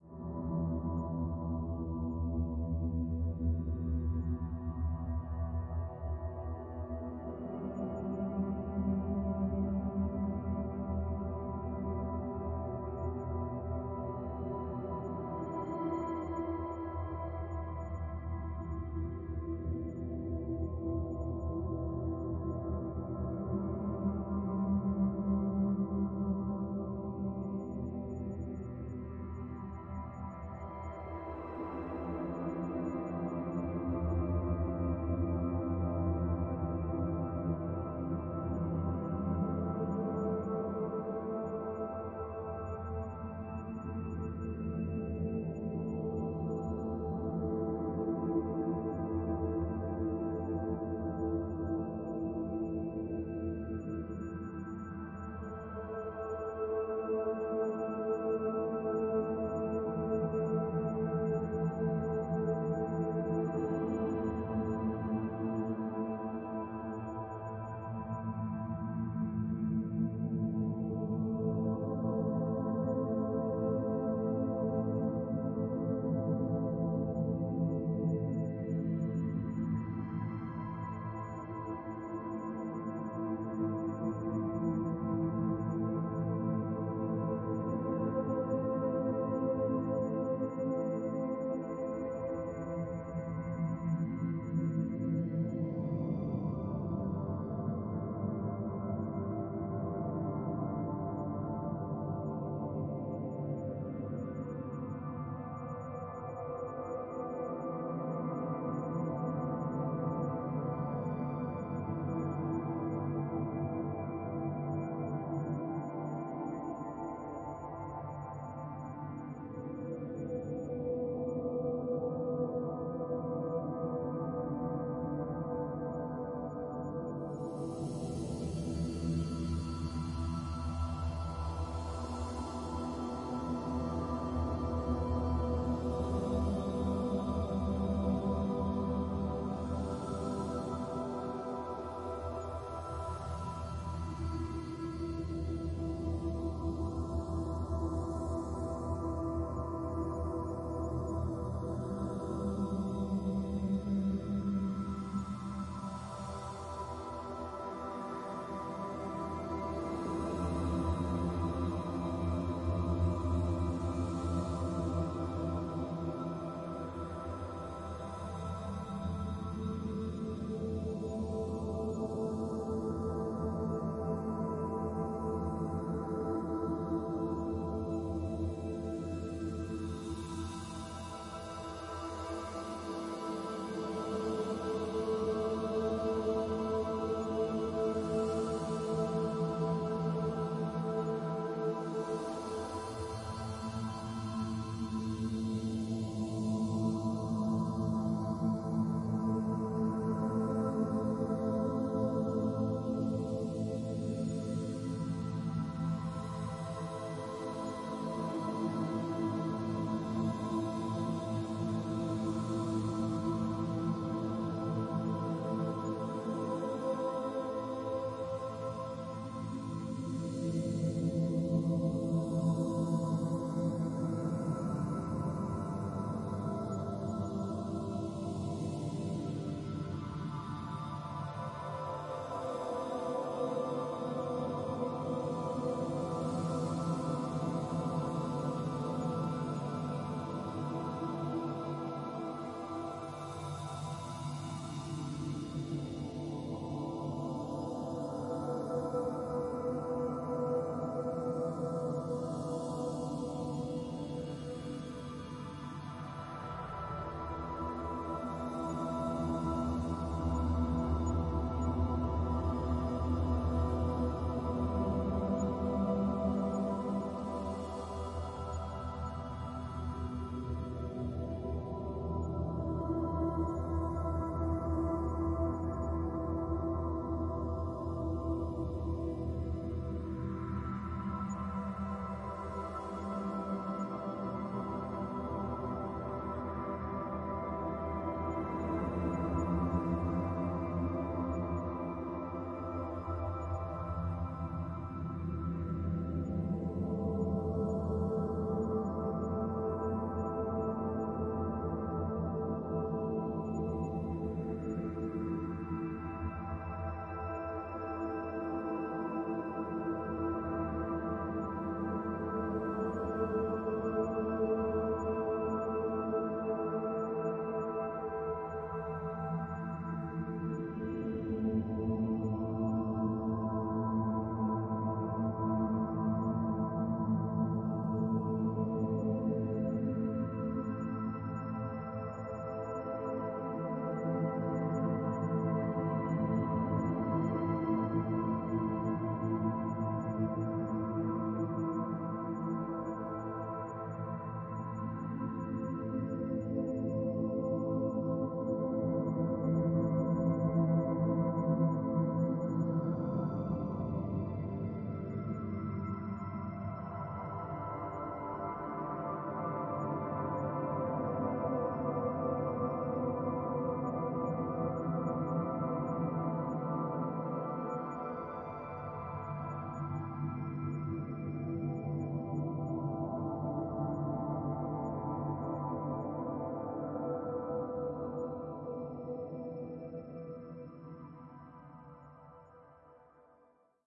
Ambient Soundscape with Shimmer
This ambient soundscape was created using a simple piano melody, drums and cymbals, and an odd little glitch affect. Using Audacity (v.2.3.3) I added reverb, delay, and a phaser. I also altered the filter curve, boosting everything below 100 Hz by 8 db and pulling back everything above 10 kHz bu 3 dB. Finally I applied the Paulstretch effect to create the slow ambience which gives a nice shimmer to the cymbals and stretches out the effect of the phaser in a really satisfying way.
It would be interesting to see what you can make of it.
ambiance, ambience, ambient, atmos, atmosphere, background, science-fiction, shimmer, soundscape, Synth, Synthesiser, Synthesizer